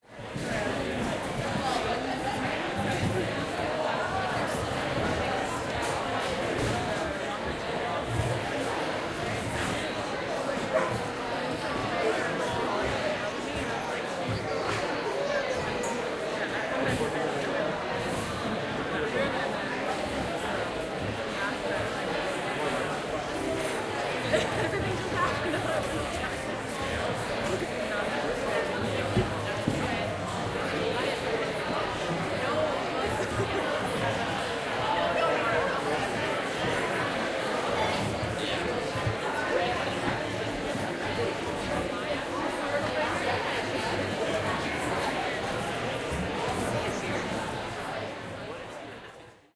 Town Hall Ambience
Zoom H2N recording of people talking in big indoor hall in rural Manitoba, Canada.
Hall, Talking, Town